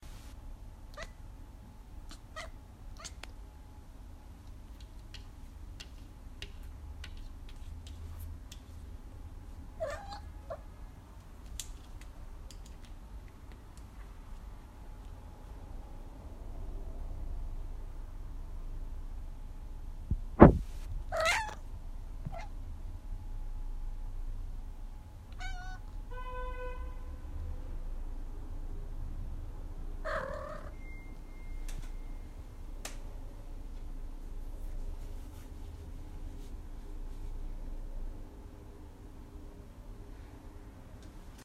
Cat meowing
Cat meows because she's trying to catch a fly.
Meowing, Animal, Meows, Cat, Little, Meow, Kitten